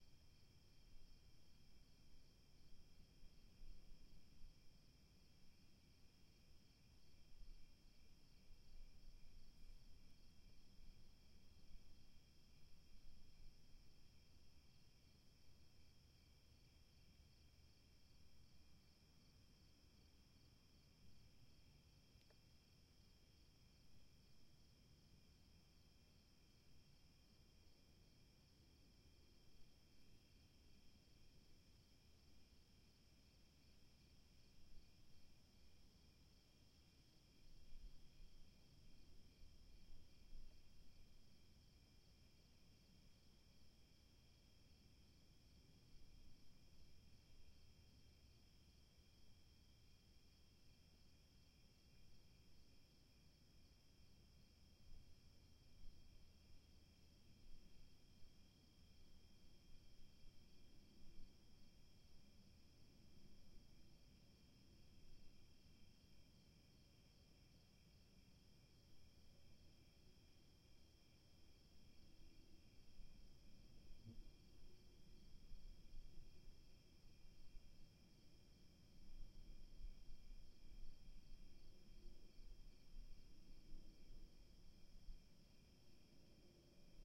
LR FRONT SUMMER EVE HUDSON CRICKETS
Summer evening in southern Quebec, Canada. This is the front pair of a 4channel recording made on an H2.
crickets, evening, summer